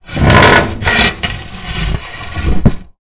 Wood Scraping Close 3
Scraping a plank. Recorded in Stereo (XY) with Rode NT4 in Zoom H4.
block, file, filing, grind, grinding, plank, scrape, scraping, scratch, scratching, squeaking, squeaky, wood, wooden